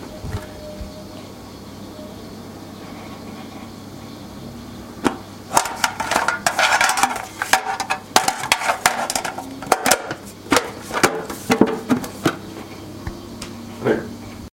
Tin storage can opening and closing OWI
Recorded with rifle mic. Tin storage can being opened and being closed.
can, closing, opening, OWI, storage, Tin